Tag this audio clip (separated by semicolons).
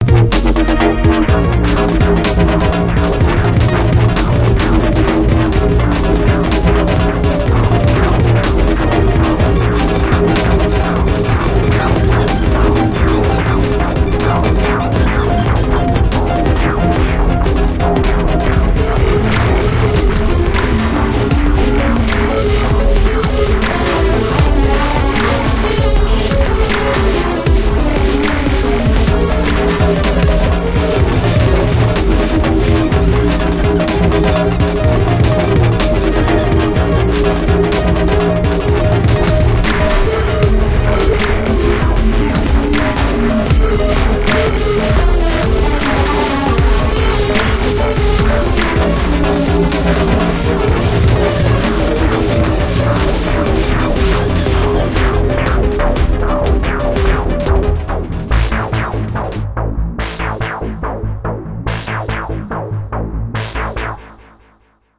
music; synthesis